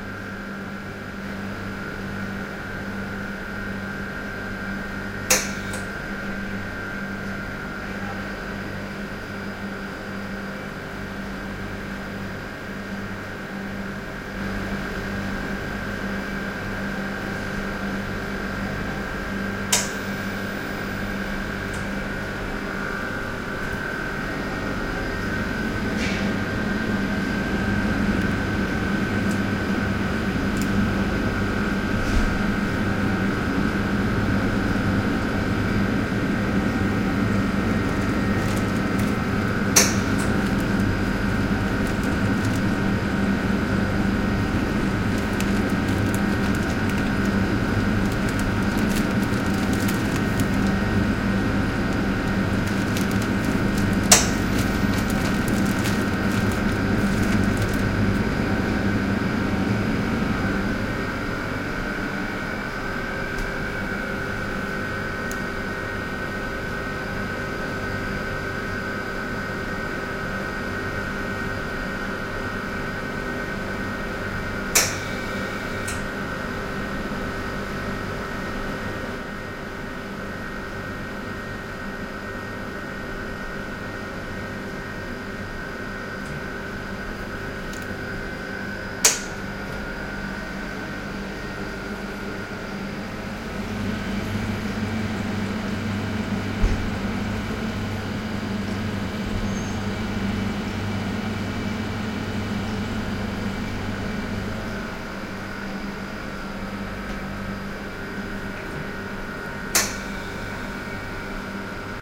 ambiance in biology lab, with noise of a deep freezer and a couple growth chambers. Higher sound levels are from the open freezer, lower ones when the door is closed / ambiente de laboratorio, la mayoría de sonidos provienen de un ultracongelador y camaras de cultivo
laboratory, relay